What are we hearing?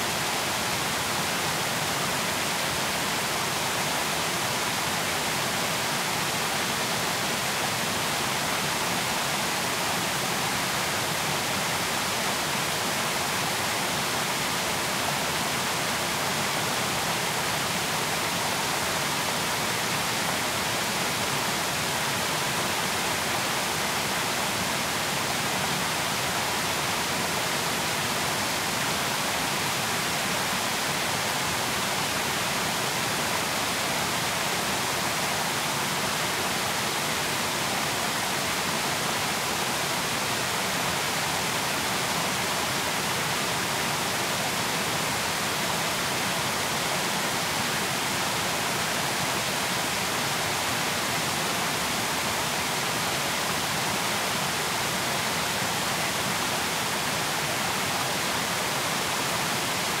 I'd love to hear about the projects you use my sounds on. Send me some feedback.